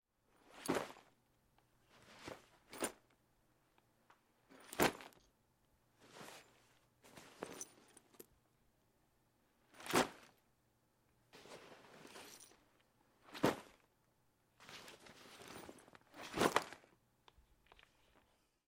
Jump Landing
Sounds of bags/gear being thrown to the ground
around, hitting, wear, movement, bag